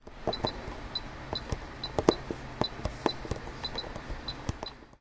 Sound produced when pushing the keys of the pad of the photocopier
This sound was recorded at the Campus of Poblenou of the Pompeu Fabra University, in the area of Tallers in the corridor A-B corner . It was recorded between 14:00-14:20 with a Zoom H2 recorder. The sound consist in a pad of a machine being pushed, so we can differenciate the high frequency tonal part of the keypad plus the impact of the finger with the pad.
campus-upf, controller, keypad, photocopier